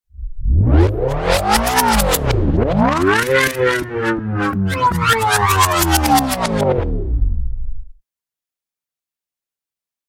Electric Engine II
Sound of an engine powering up and powering down. Made with Studio One and all kinds of effects.
Device, Downriser, Drive, Effect, Electric, Engine, Error, Film, Foley, Fx, Game, Malfunction, Motor, Movie, Powerdown, Powerup, Rise, Riser, SciFi, Sfx, Start, Transportation